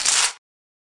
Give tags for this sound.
paper-finish; video-game

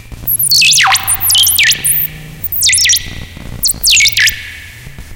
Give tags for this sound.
analog; hardware; arp2600; electronic; arp; synth

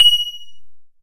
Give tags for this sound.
animation; cartoon; film; game; light; magic; movie; reflect; reflecting; reflection; video

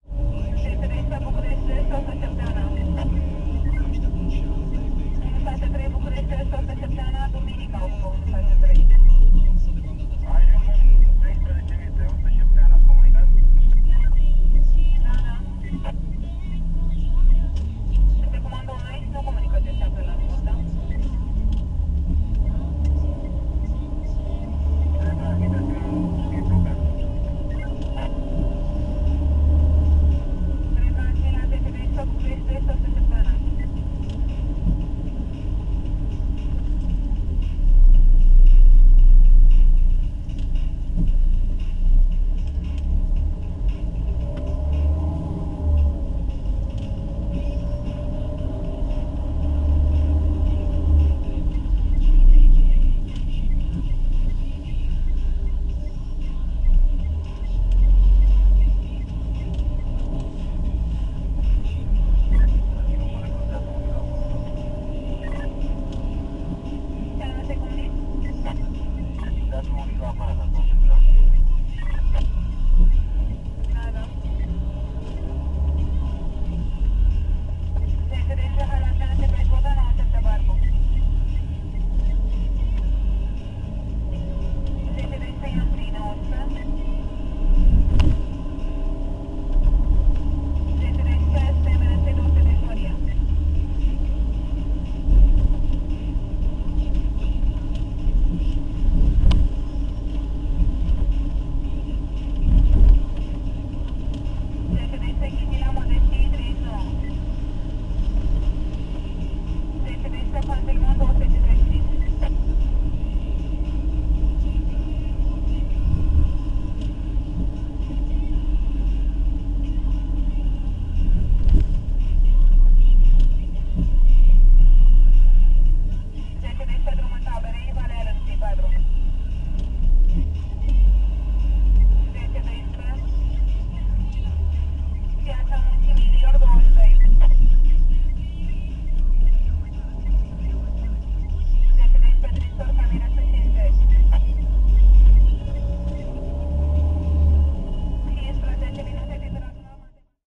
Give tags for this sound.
inside-car; taxi

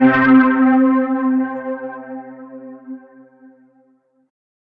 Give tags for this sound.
analogue; synth-bass; warm; vintage; synthbass

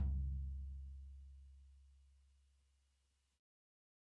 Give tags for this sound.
16; dirty; drum; drumset; kit; pack; punk; raw; real; realistic; set; tom; tonys

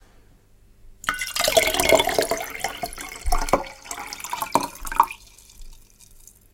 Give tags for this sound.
liquid; glas; bottle; water

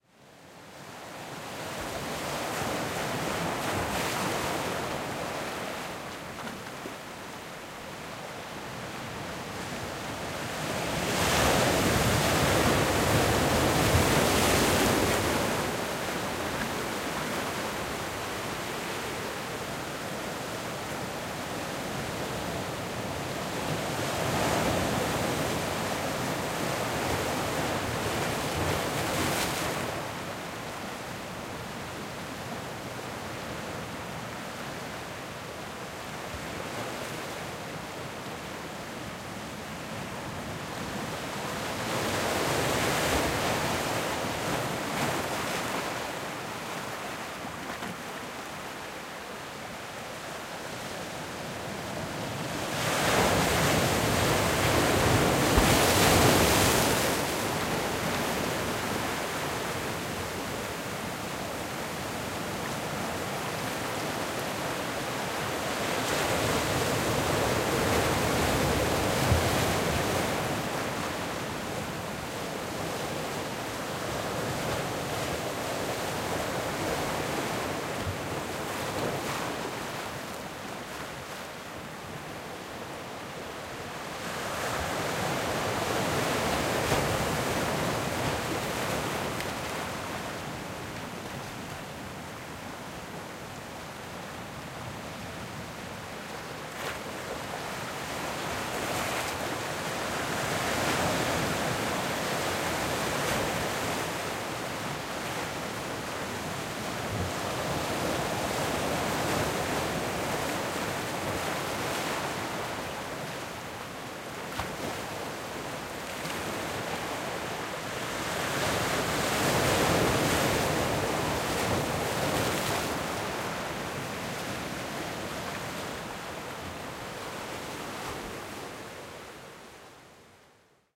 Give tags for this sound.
beach; ocean; sea; shore; surf; water; wave; waves; wind

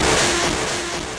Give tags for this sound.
amp; distorted; echo; guitar; noise

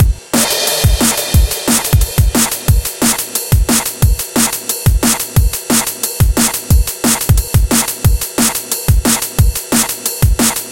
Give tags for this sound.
Bass; Dream; DrumNBass; Drums; dvizion; Heavy; Loop; Rythem; Synth; Vocal; Vocals

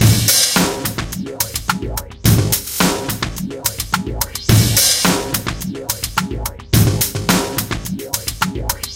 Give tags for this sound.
beats; funky; processed

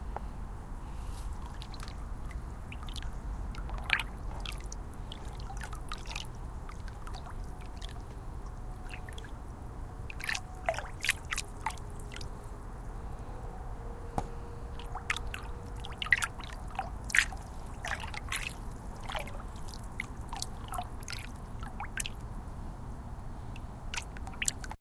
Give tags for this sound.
belgium; cityrings; sonicsnaps; soundscape; wispelberg

